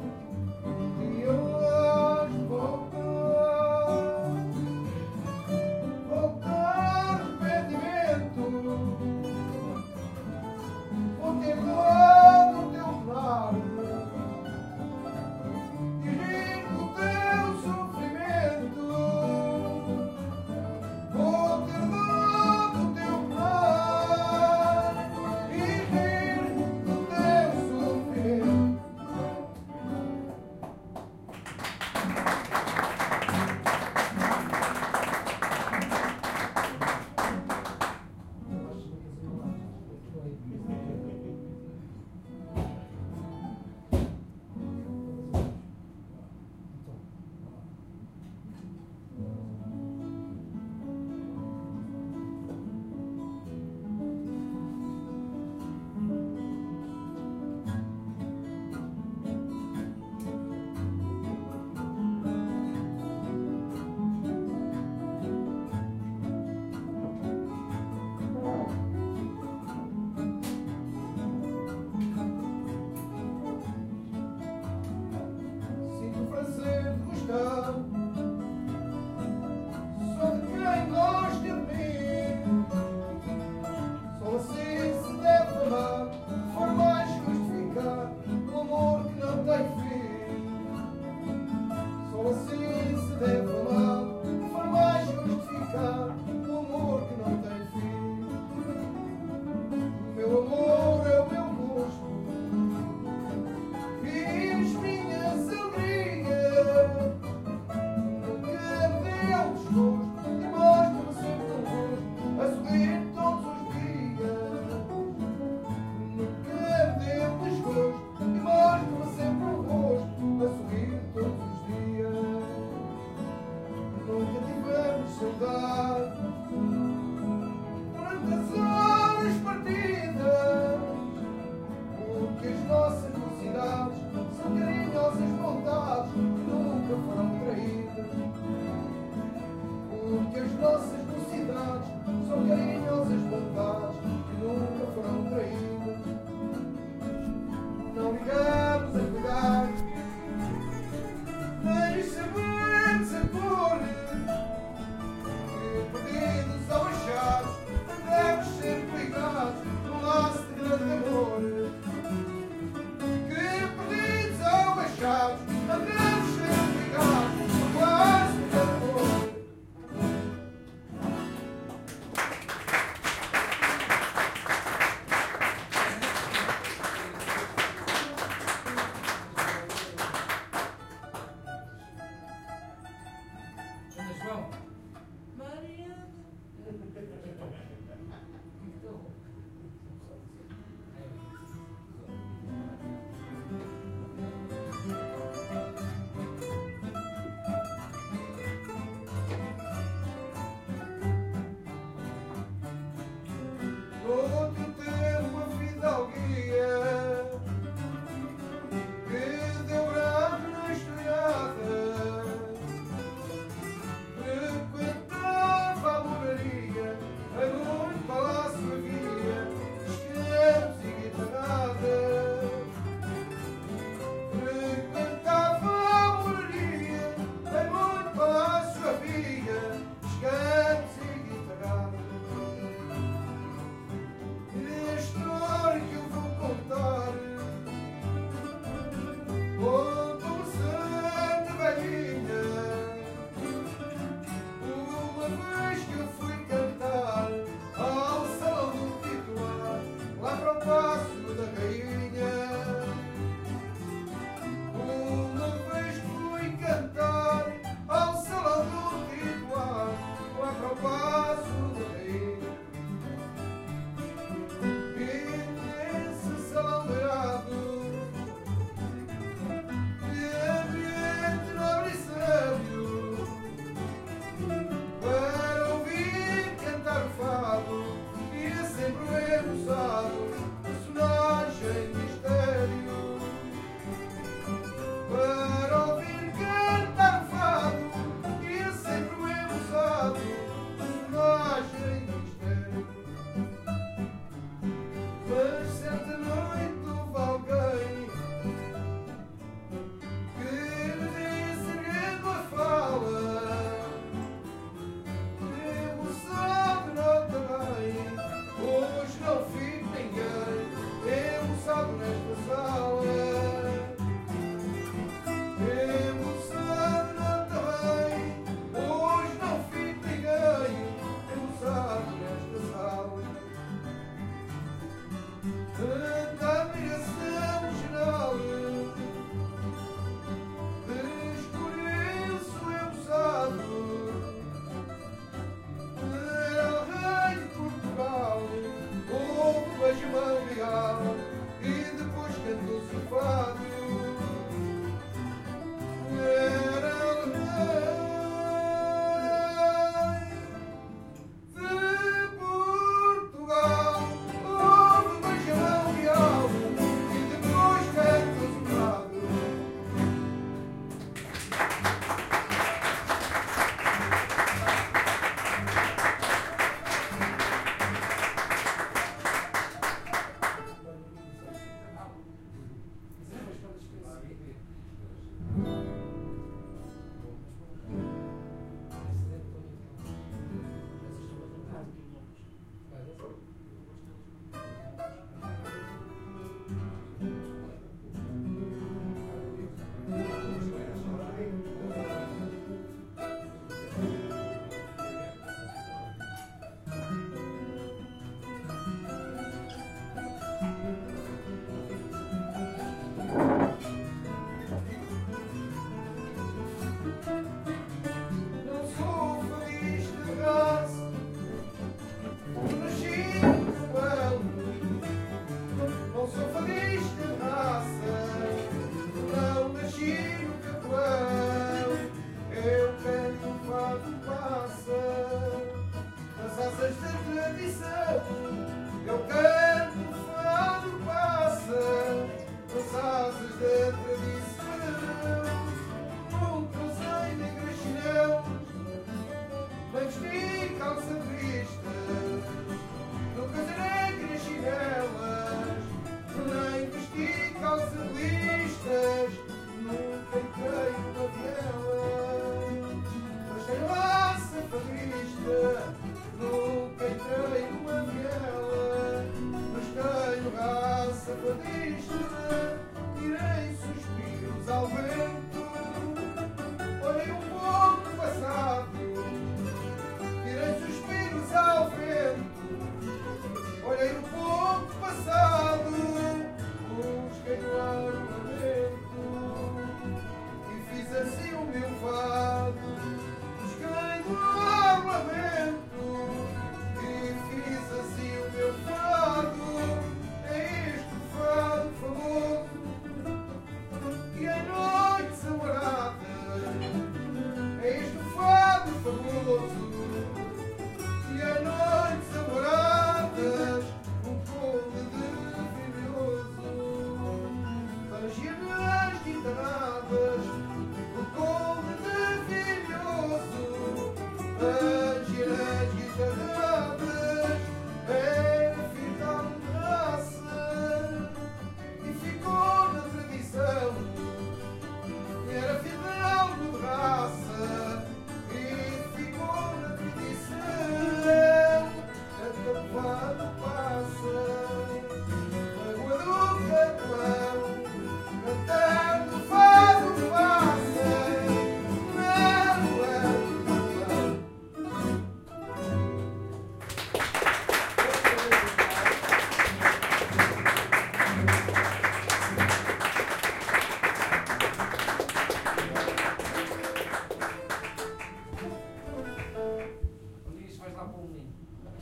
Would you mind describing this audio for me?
STE-017-fadovadio
Fado vadio in an bar(tasca) in the Alfama district of Lisbon.
bar, fado, field-recording, lisbon, portuguese, singing, soundscape, tasca